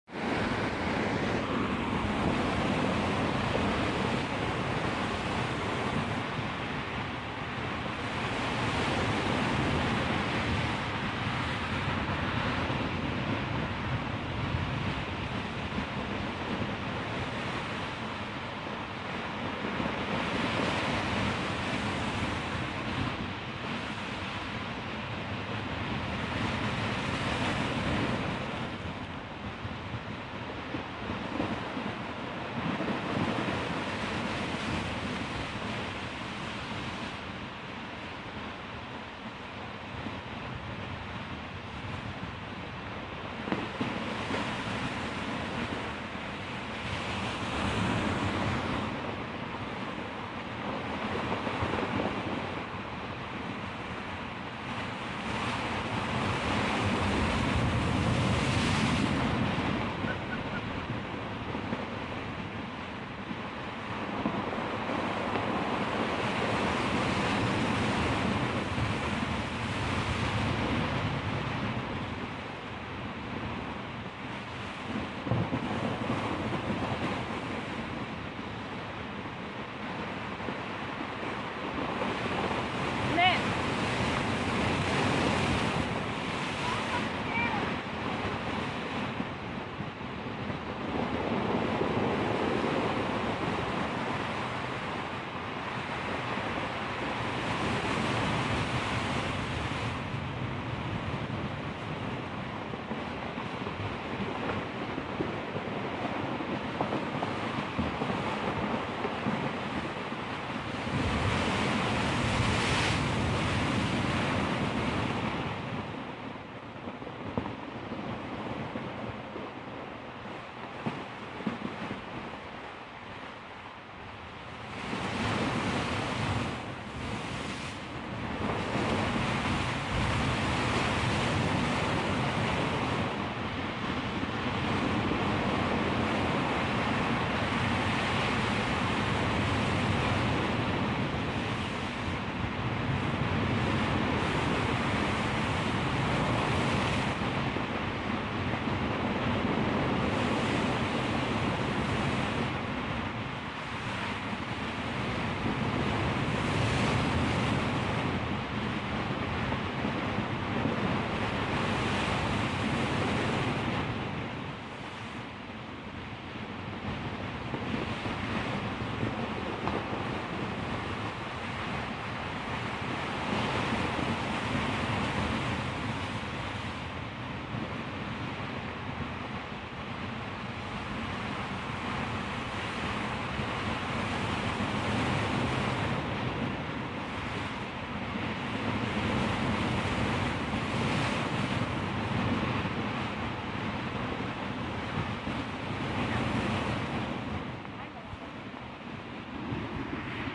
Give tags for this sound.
ocean atlantic seashore big waves atmosphere

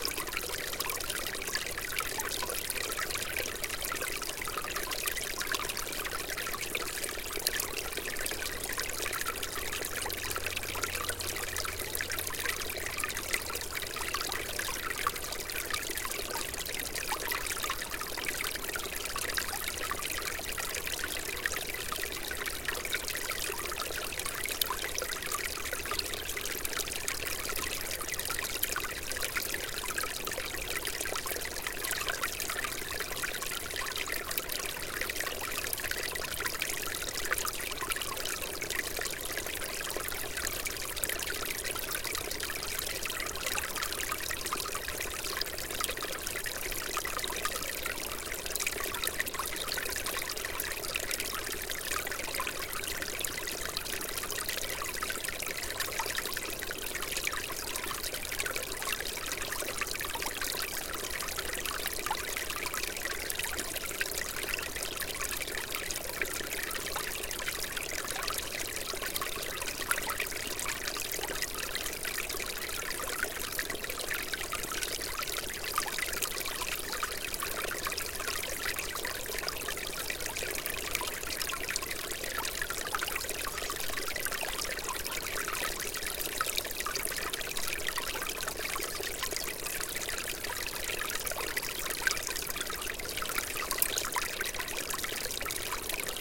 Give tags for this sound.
snow-thawing ambient loop nature water

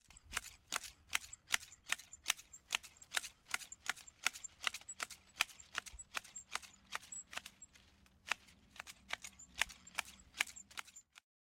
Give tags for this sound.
cutting edges garden gardening Grass landscaping shears trimming

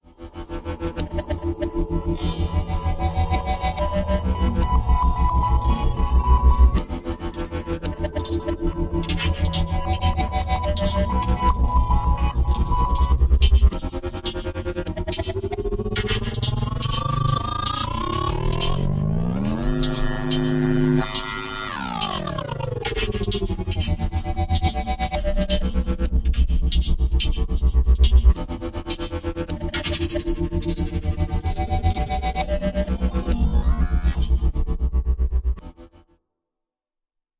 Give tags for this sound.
delayed Sounds modulated vst

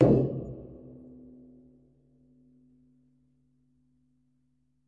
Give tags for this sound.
fuel oil Tank

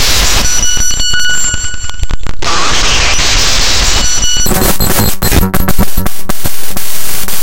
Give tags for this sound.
electronic fubar noise processed

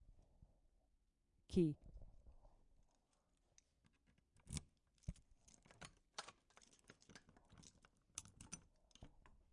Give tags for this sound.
door unlock

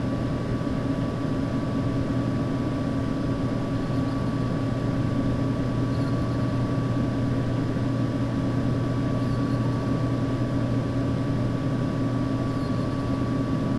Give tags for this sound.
computer fan noisy electronic desktop

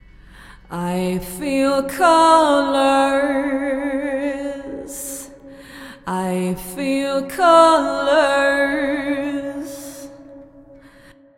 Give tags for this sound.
singing female female-vocal strong woman

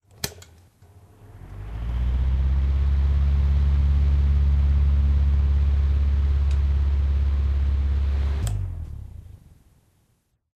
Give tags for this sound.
motor fan click hum off electric switch humming machine airflow

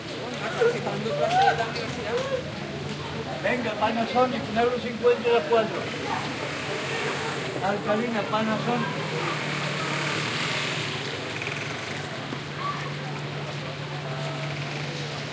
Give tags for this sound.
voice city spanish field-recording streetnoise

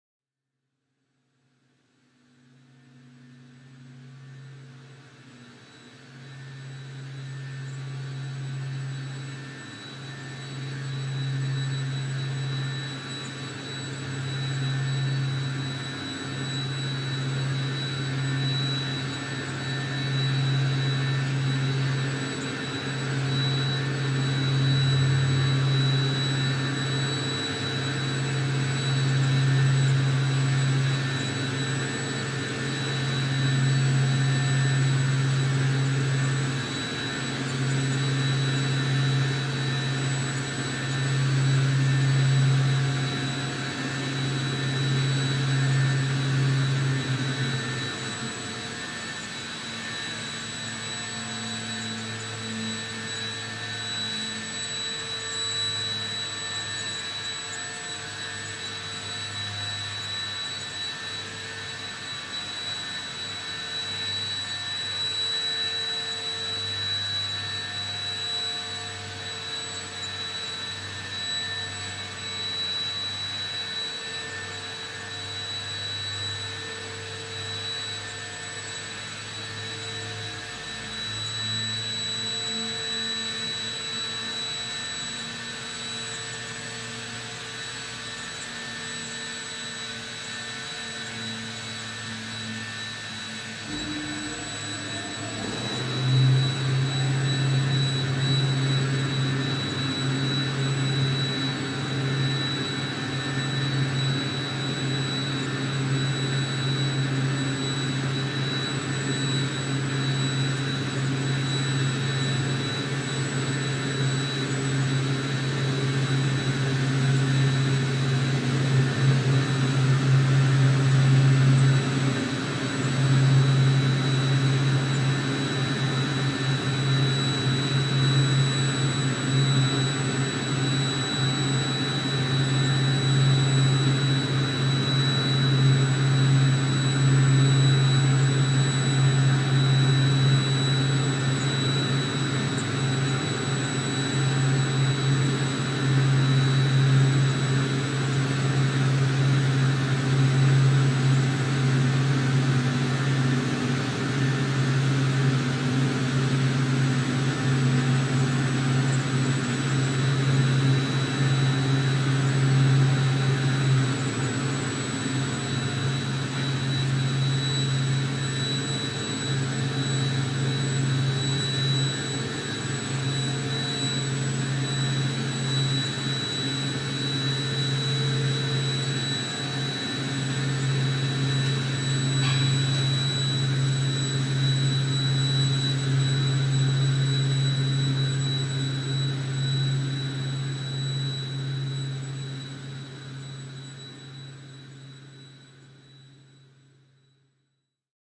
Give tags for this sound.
drone field-recording electronic high-pitch noise windmill

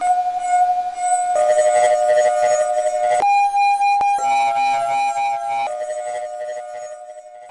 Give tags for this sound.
acoustic glass crazy music experimental

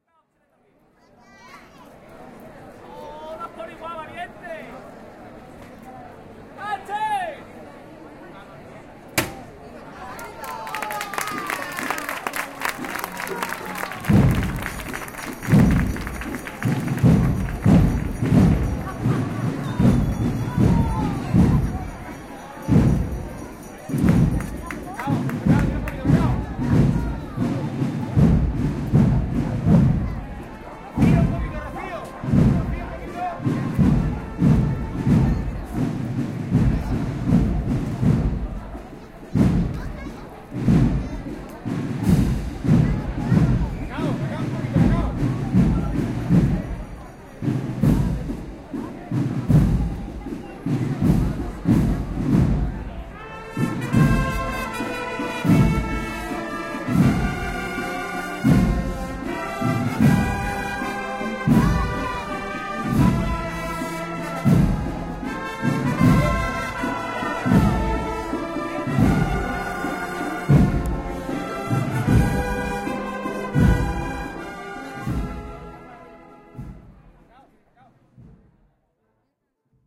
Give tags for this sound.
easter drums band procession tarifa

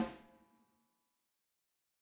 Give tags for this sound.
breckner dynamic josephson kent layers ludwig microphones multi reverb samples

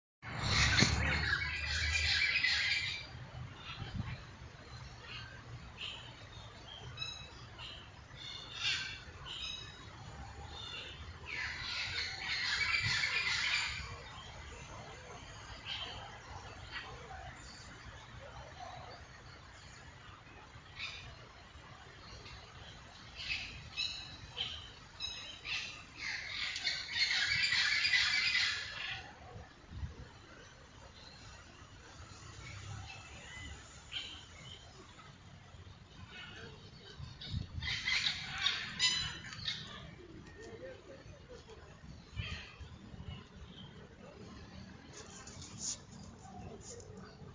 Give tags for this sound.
Bird Nature Singing

